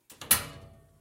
Oven door being opened